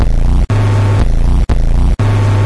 A simple, weird little loop. If anyone can find a use for it, I'd be impressed. I can't think of anything to use it for.